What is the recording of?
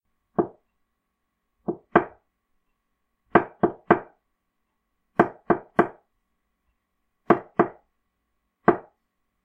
Knocking with knuckles. Recorded with a Blue Yeti.